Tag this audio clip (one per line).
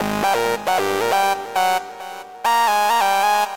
sound
club
free
synth
trance
fast
loop
hard